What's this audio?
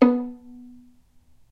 violin pizzicato "non vibrato"